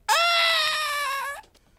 Male screaming weird